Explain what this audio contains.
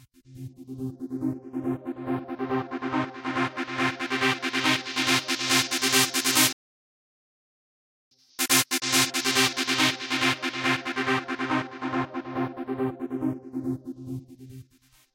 A trumpet trance loop, combine 3 OSC and Fuzz Generator.Added re verb, Hall, Overdrive, Equalizer and different filter.First Sound is fading in.The second sound is fading out, using a simple filter.created with FL-Studio 6